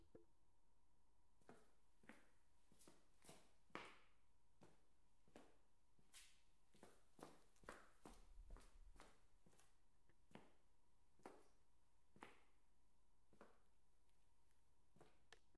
Walking on concrete.
Me walking on concrete floor, recorded with a Zoom h1.